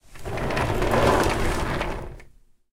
Rolling an office chair